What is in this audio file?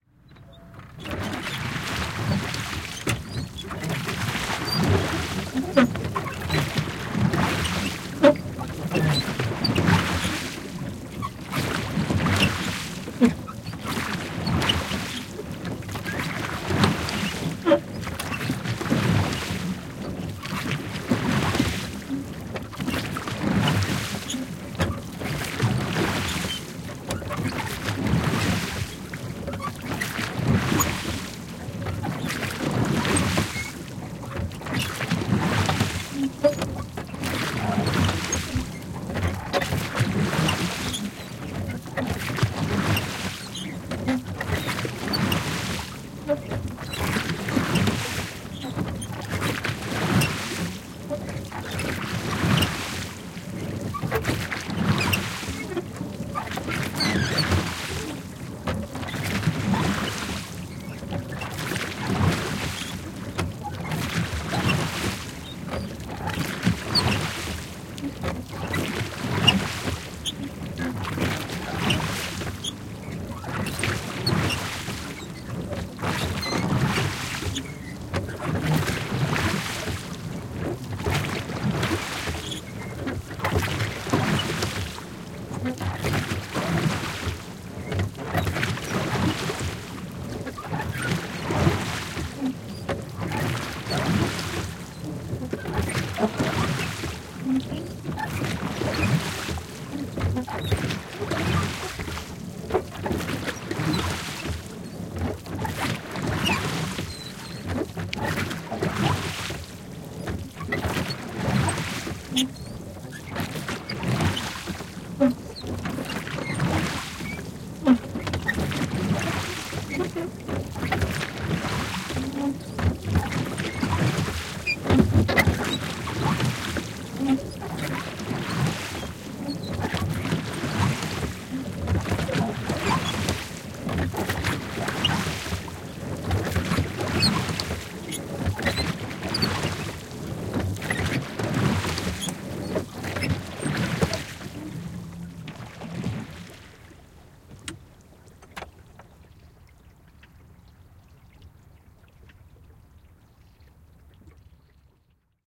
Kirkkovene, nopea soutu / Rowing boat, several, eight pairs of oars, so called churchboat, fast rowing, like rowing race
Kahdeksan airoparin kirkkovene, soutuvene. Kilpasoutua mukana.
Paikka/Place: Suomi / Finland / Vihti
Aika/Date: 03.09.1989
Boating, Field-Recording, Finland, Finnish-Broadcasting-Company, Rowboat, Soundfx, Soutuveneet, Suomi, Tehosteet, Veneily, Vesiliikenne, Yle, Yleisradio